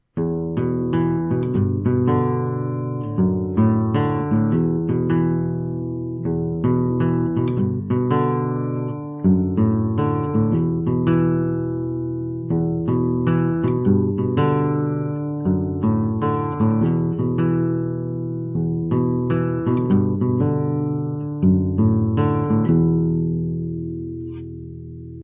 This sound is a solo classical guitar rhythm. It can also be used as a loop.